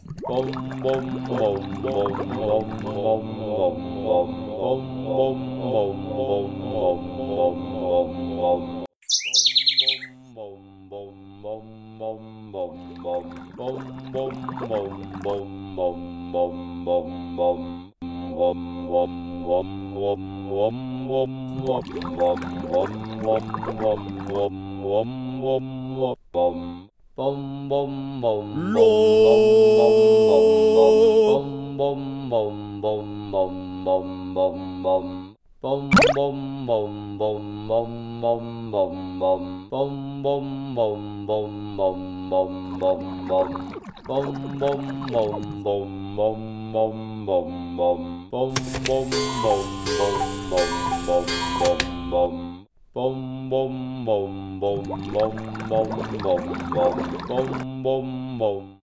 Sick beat!
A sick beat.